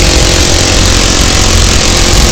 minigun firing loop